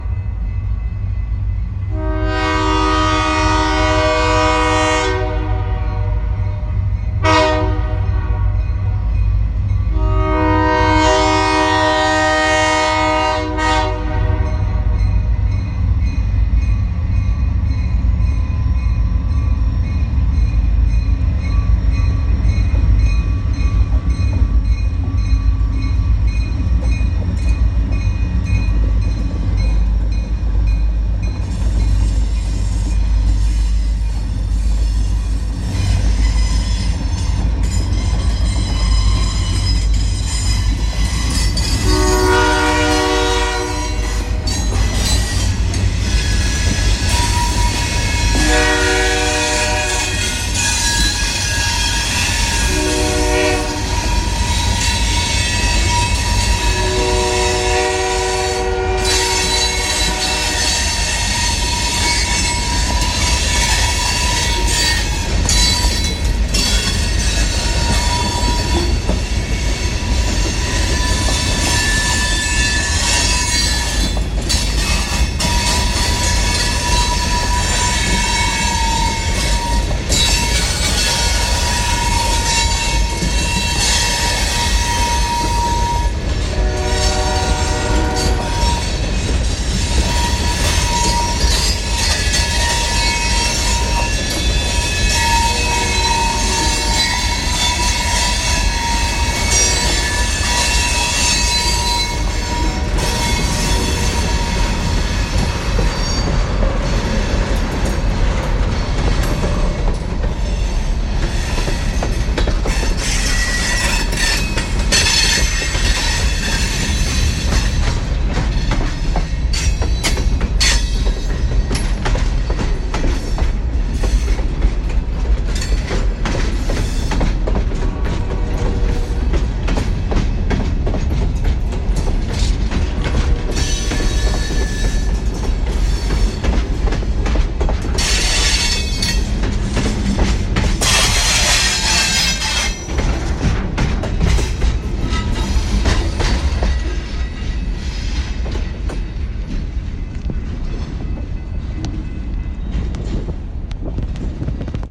train at wah kee
No limiting. I would normally be using a Røde XYL, but I'm amazed how well this came out with the onboard mic. Thank you to Apple!